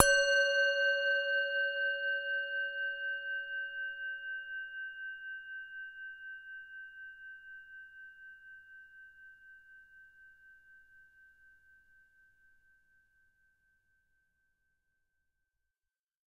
Singing Bowl 23042017 02 [RAW]
Raw and dirty singing bowl sample recorded using a Zoom H5 recorder with it's XY capsule.
Cut in ocenaudio.
Enjoy!